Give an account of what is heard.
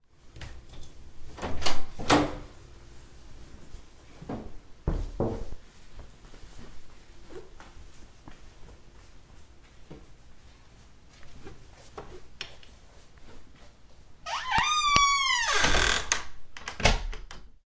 Me walking around my house and reaching my room. Pretty simple, nothing else.